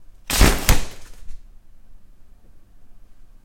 openning an automatic umbrella sound
openning-umbrella, raincoat, umbrella